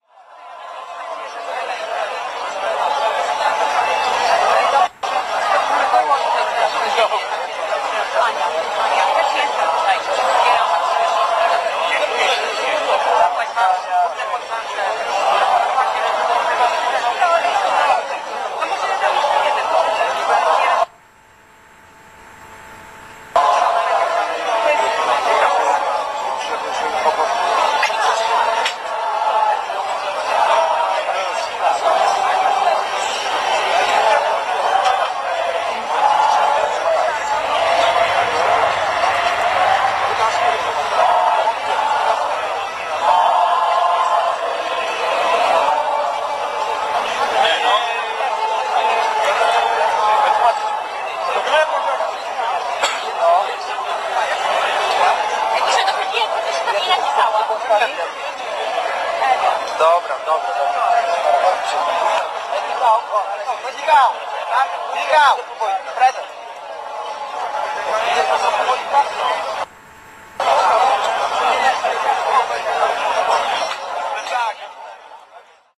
16.09.2010: about 21.00. the sound of political-religious gathering in front of Polish parliament in Warszawa recorded via internet during on-line live transmission.